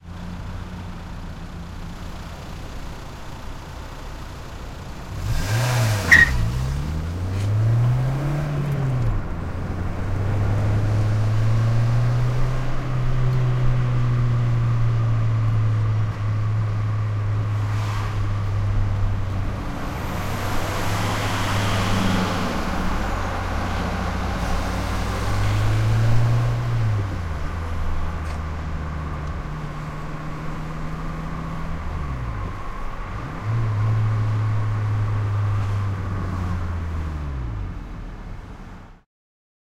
peugot 206 car interior open window accelerating aggressively and driving hastefully
Recorded with a Sony PCM-D50 from the inside of a peugot 206 on a dry sunny day with open window.
Waiting at traffic light, then driver hits the gas the tires squeak and some other cars passing by.
squeaky, open, 206, tire, squeak, aggressively, peugot, accelerating, window, interior, tires, car